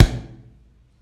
Plastic bottle hit on the bottom to resemble a bass drum.